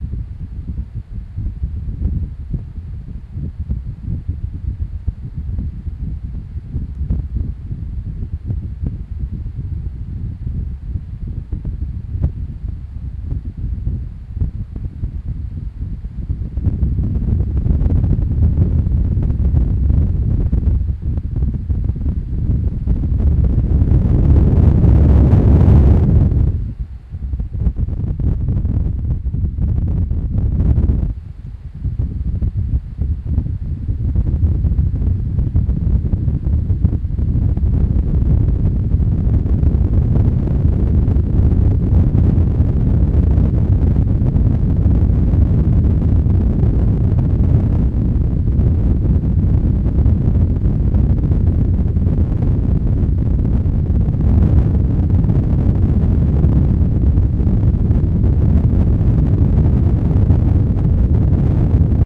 Abstract Soundscape Project